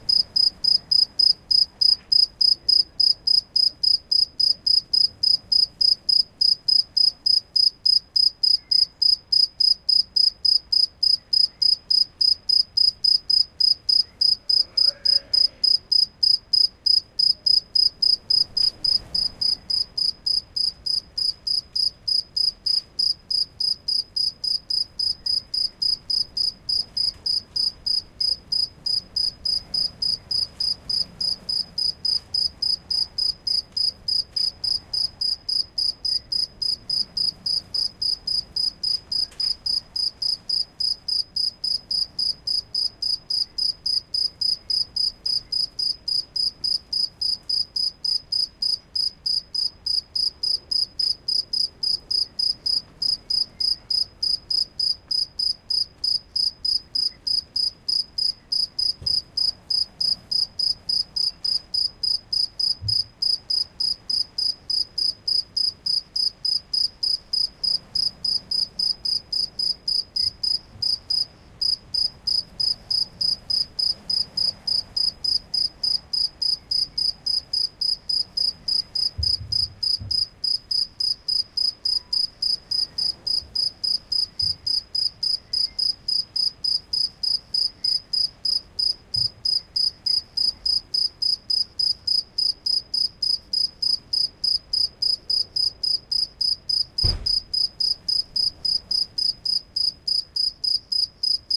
20090814.bolonia.cricket.close
Cricket singing very close. Recorded at Bolonia beach, near Tarifa (Cadiz, S pain). Shure WL183 pair (with windscreens) into Fel preamp, and Edirol R09 recorder. Unedited.
ambiance, bolonia, cricket, field-recording, insect, nature, night, south-spain, summer, tarifa